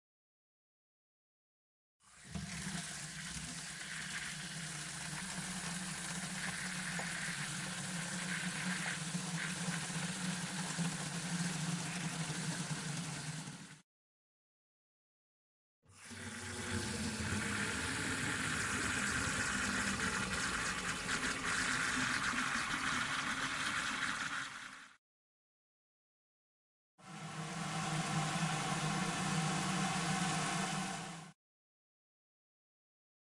2 in 1
filling the plastic watering can with a garden hose with a strong jet of water
filling the garden watering can with a sprayer on the hose, short
close perspective